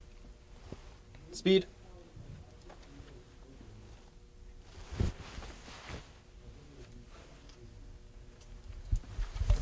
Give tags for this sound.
bike
film
foley

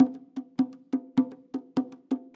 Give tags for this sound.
bongo
drum
loop
percussion

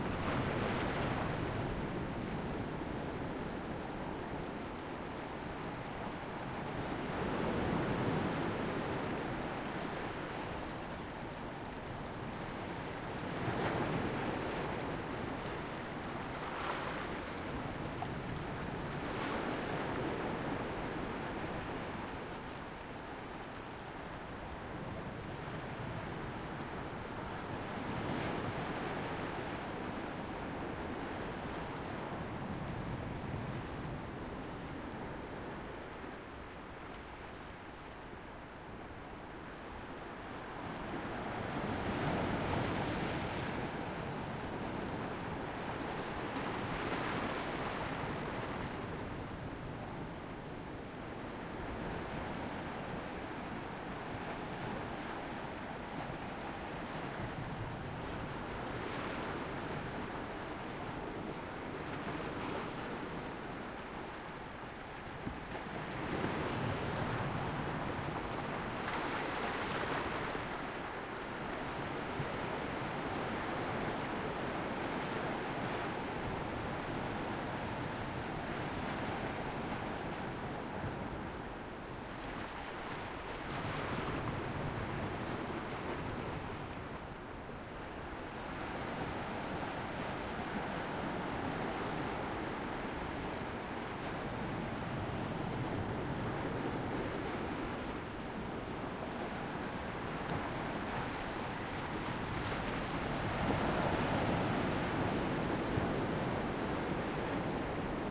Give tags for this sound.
field-recording
h4n
zoom
portugal
ambience
waves
beach

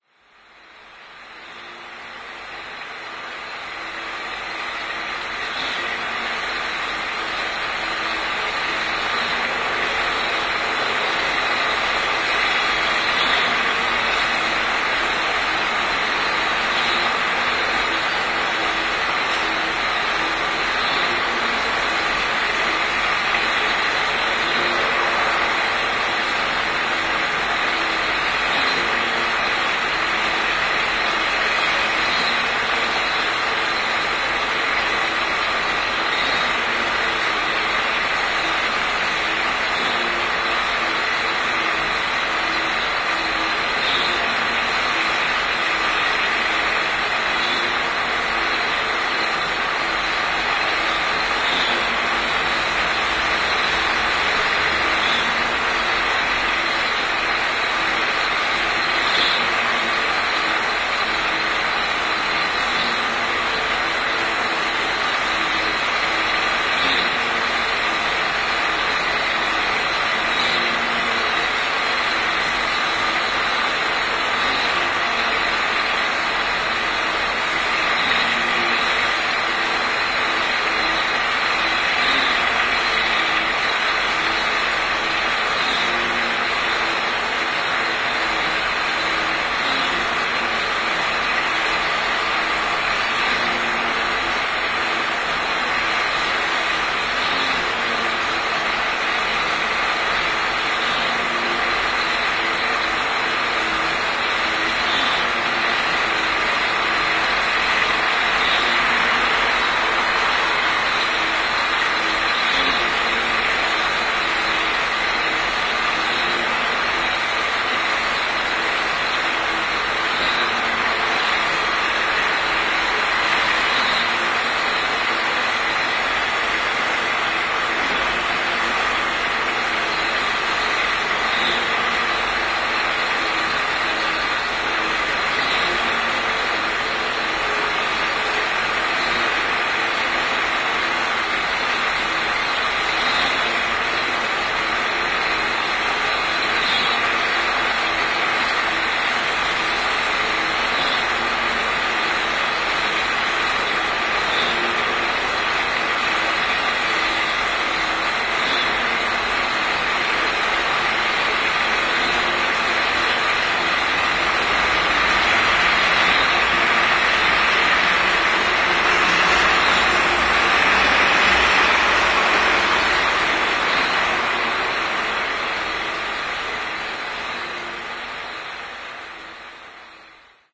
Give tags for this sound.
poznan
pump
noise
street
tanker

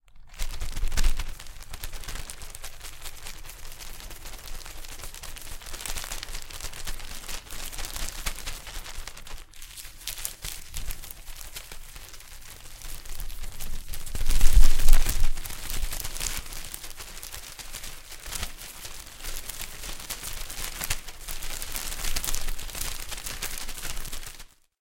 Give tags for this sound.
Papery
OWI
Wind
Windy
Paper

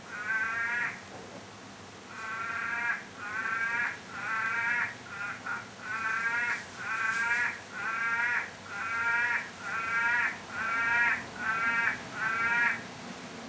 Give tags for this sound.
north-queensland
rain
frog
australia

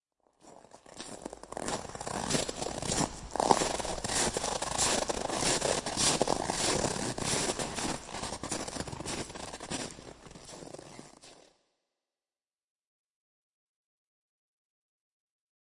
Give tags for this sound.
running
walk
ground
footstep

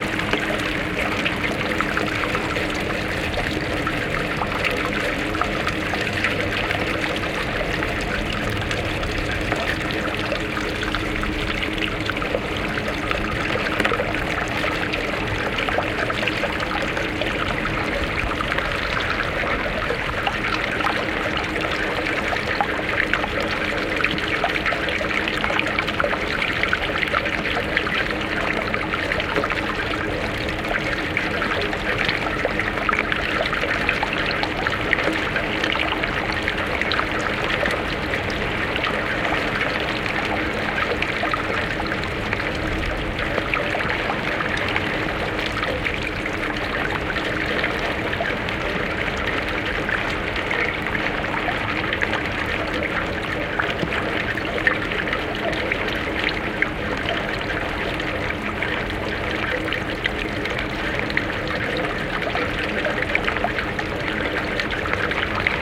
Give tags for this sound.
Drain
Field-Recording
Gutter
Water
Pipe
Stereo
Gurgle
Gush
Bubbles
Drips
Flow

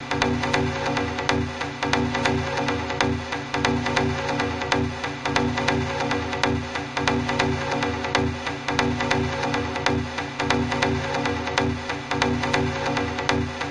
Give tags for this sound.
beat
dance
electronica
loop
processed

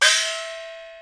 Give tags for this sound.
percussion
china
QMUL
peking-opera
idiophone
beijing-opera
chinese
gong
icassp2014-dataset
chinese-traditional
CompMusic
xiaoluo-instrument

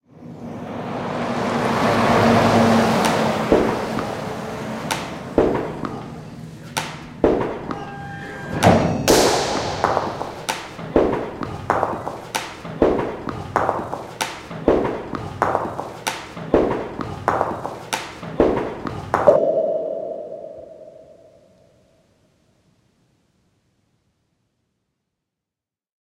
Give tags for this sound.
conveyor-belt
curler
Ghent
groovy
kanaalzone
rhythm

